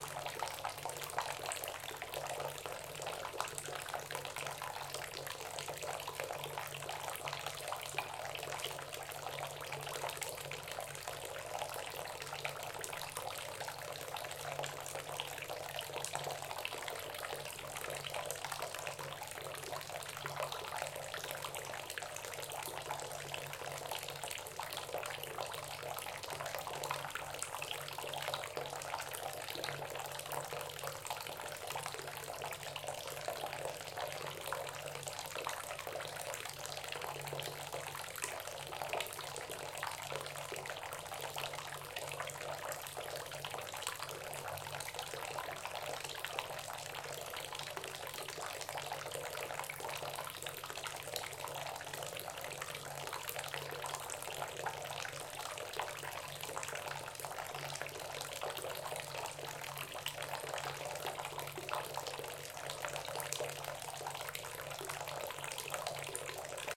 Filling up a tub slowly
Water, Tub, Filling